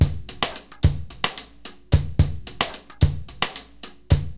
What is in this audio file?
odd Drum loop created by me, Number at end indicates tempo